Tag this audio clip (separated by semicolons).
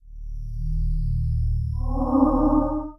csound; ominous; spectral; sonification; moan